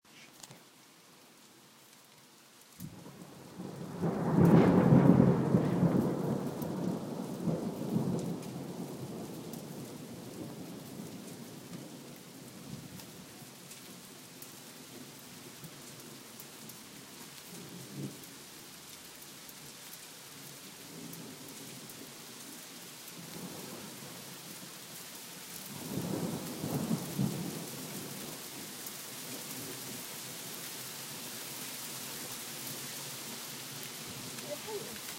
Thunder and Rain
thunder storm and rain
thunder, weather, thunder-storm, storm, lightning, field-recording, thunderstorm, rain